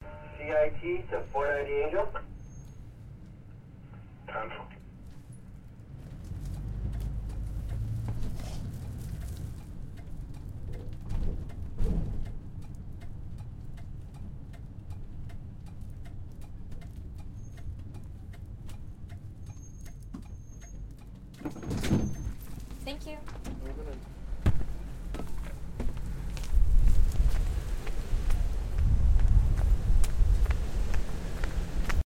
The sound here is of a university shuttle service within its campus.
Door, Free, Ride, Road